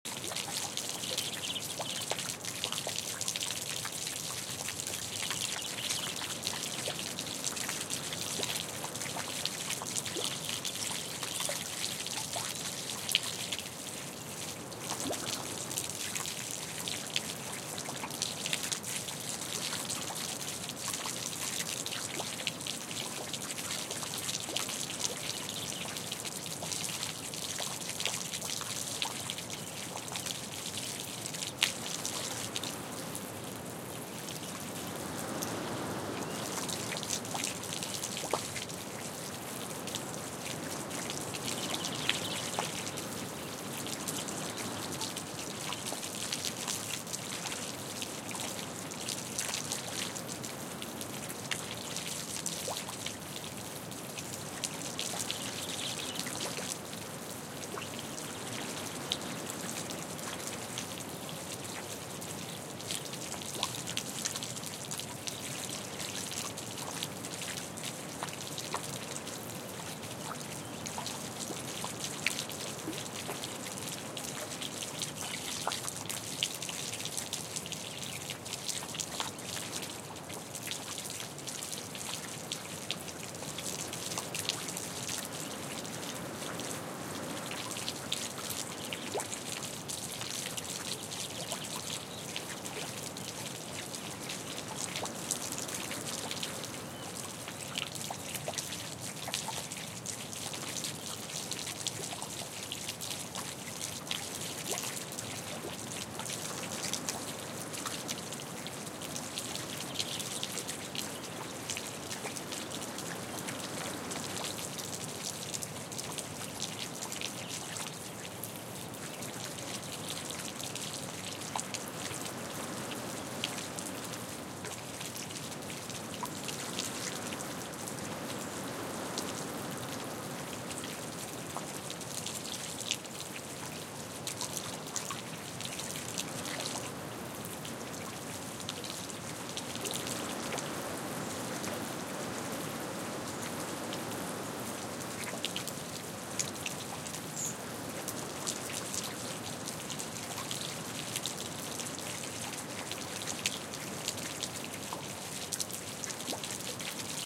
at dawn, storm is over but there is still some wind and water keeps falling from the roof and splashing. Birds start to sing timidly. Recorded near Hali (Austurland, Iceland) using Shure WL183, FEL preamp and Edirol R09 recorder
ambiance, birds, dawn, field-recording, iceland, nature, rain, storm, wind